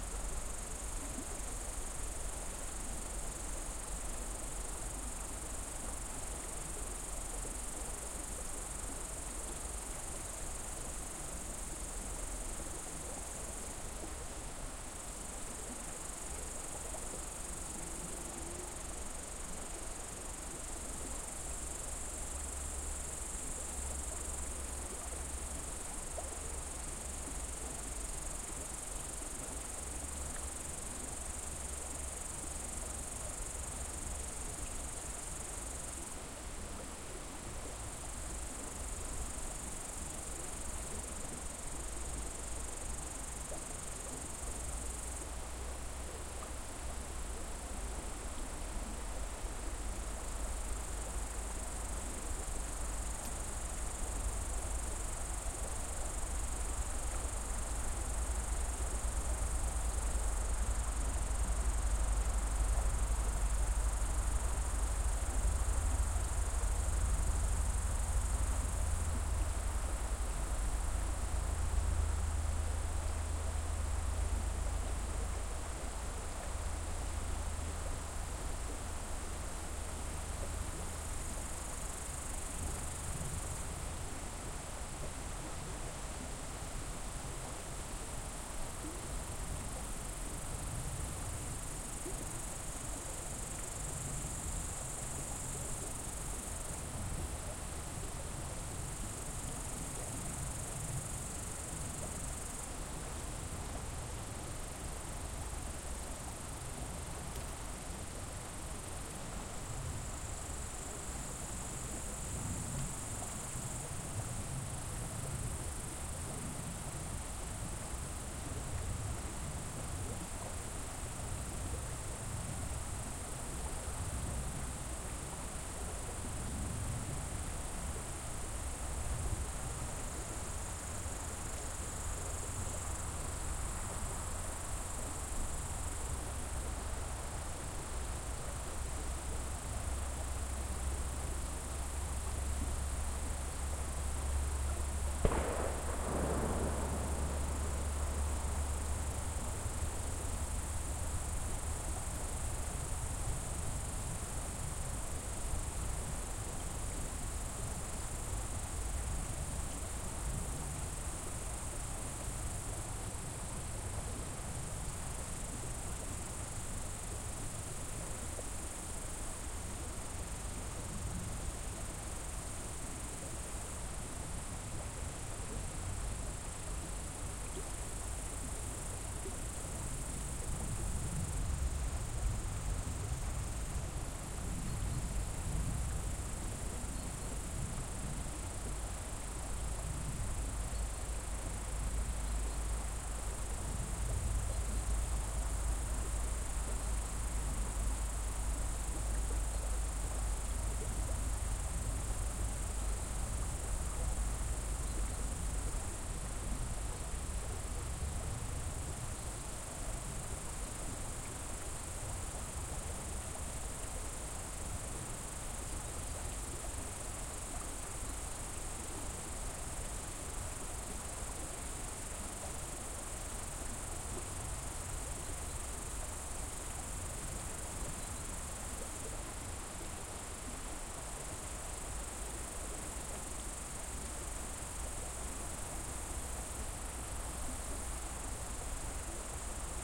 crickets and river
Still time to use those Primo EM172 mics. The river Innerste in the Harz Mountains, crickets and a rifle shot. Sony PCM-D50 recorder.
rifleshot, crickets, shot, field-recording, river, harzmountains